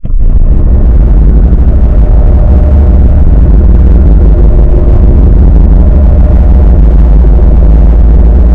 ambient hell
An edited version of putrid_heartbeat that sounds like the ambient music of somewhere horrifying. This could be used in a horror game or as an ambient music for a terrifying place in an adventure game.